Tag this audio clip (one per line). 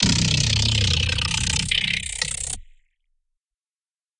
beast
synth
animals
sound-design
roar